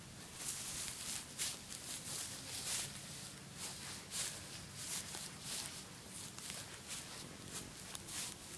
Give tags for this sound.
human footsteps walking feet

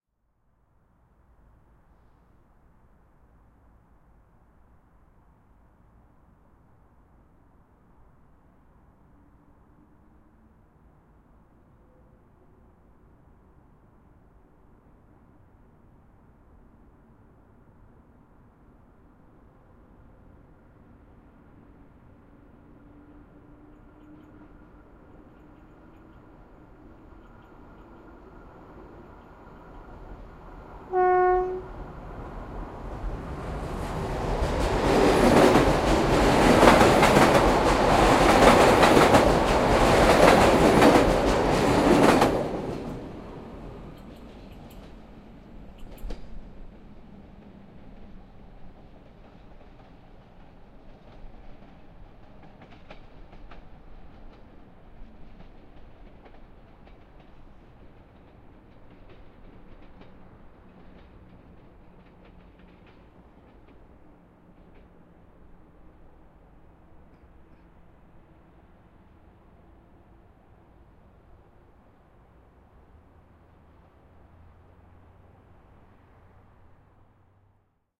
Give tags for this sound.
Trains Passing Road Transport Train Locomotive Travel

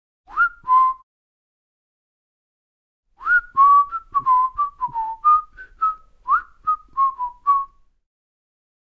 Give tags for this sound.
whistle mouth Pansk CZ Panska Czech

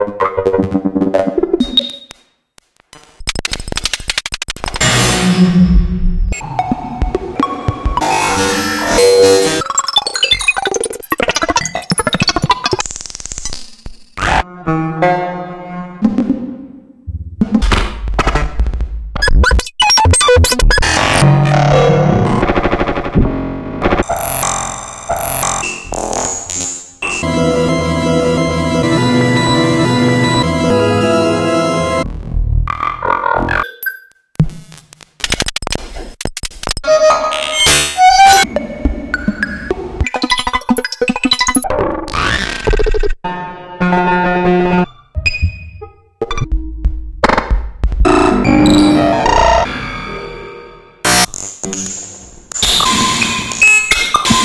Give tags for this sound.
er301 Scary Abstract Sci-Fi Space UFO Reel modularsynth Melodic Clicks modular Morphagene Strange Synth Future Sound-design Alien Creepy Organ Eurorack Synthesizer Glitch Synthesiser Make-Noise autogen301 buchla Spacey Weird Zaps

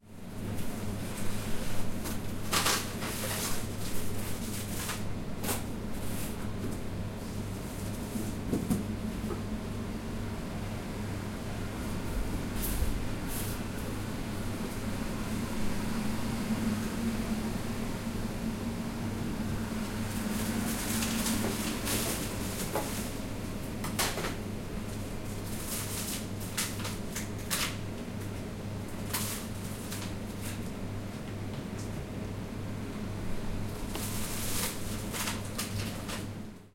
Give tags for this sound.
atmosphere electronic ambience coffeeshop

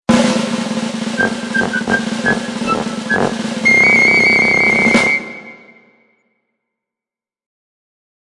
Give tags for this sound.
fanfare theatre silly funny cartoon

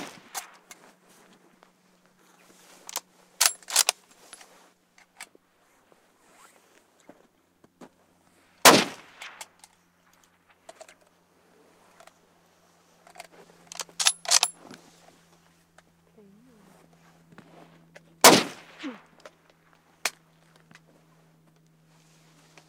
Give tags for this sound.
303 casing eject enfield fire gun lee rifle shot shots

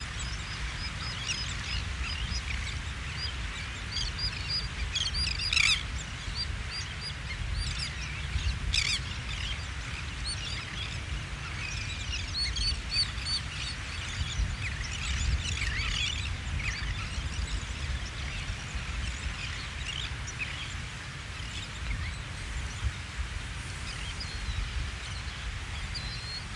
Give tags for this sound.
Birds Environment Outdoors Water Ambient Lake

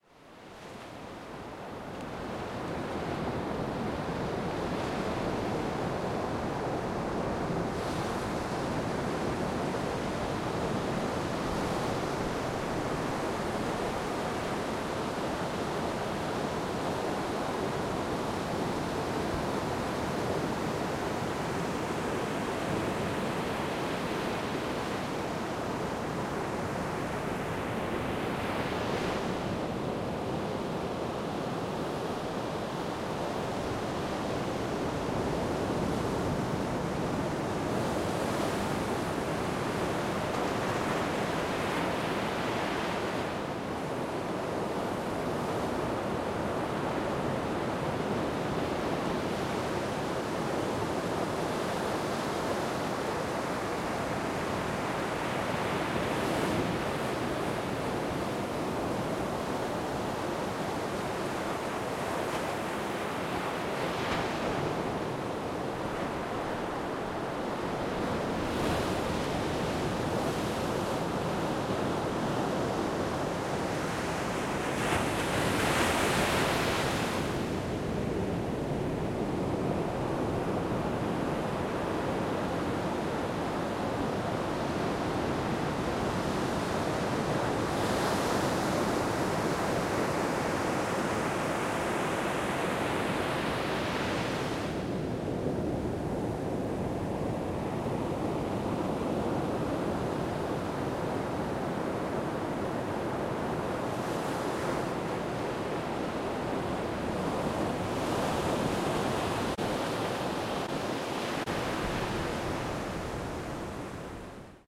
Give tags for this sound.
beach water

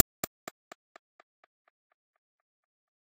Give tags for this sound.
hand-drawn impulse response sample

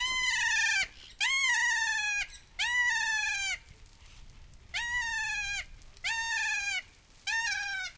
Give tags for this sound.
field-recording loro parrot bird kea papagei birds

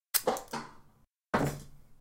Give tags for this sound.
Thump Bonk Metallic Hit Impact

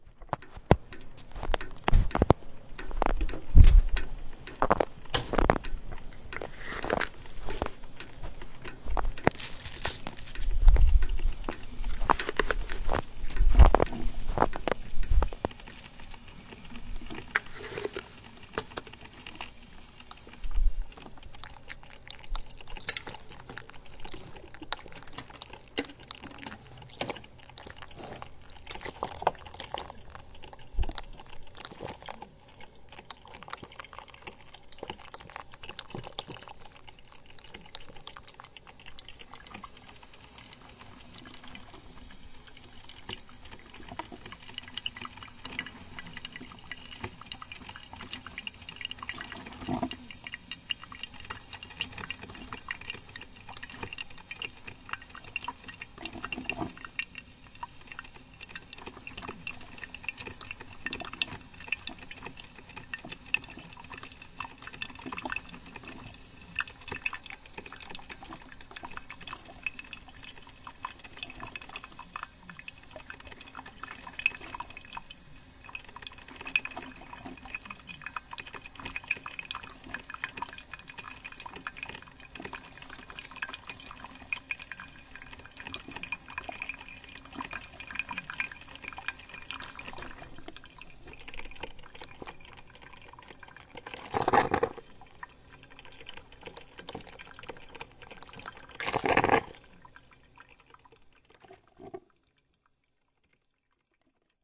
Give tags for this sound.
snow-walk walking-in-snow crunch

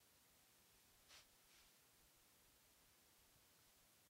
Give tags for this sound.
mesedora mesedora2 mesedora1

